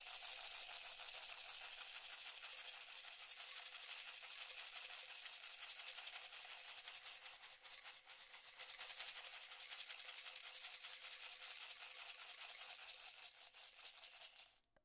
Panned shaker
Shaker recorded in stereo.
shaker, rhythm, panning, percussion